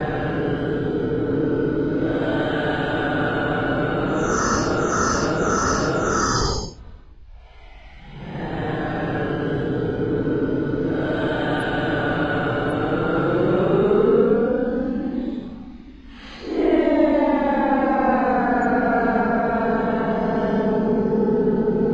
Almost illegal, horrifying and purely evil noises created by paulstretch extreme stretching software to create spooky noises for haunted houses, alien encounters, weird fantasies, etc.
ghost, haunting